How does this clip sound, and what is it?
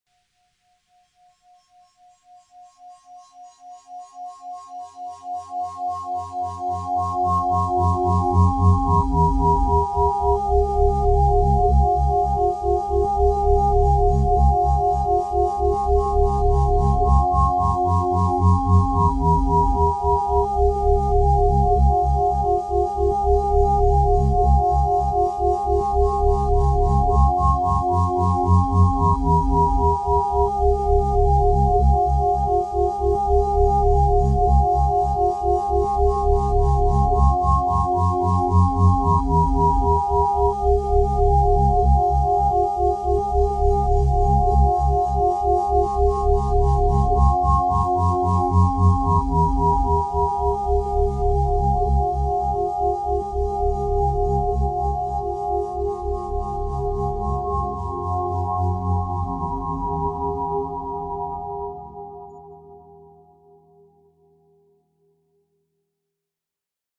Meditative Ringing
Relaxing ringing tones looped for a minute.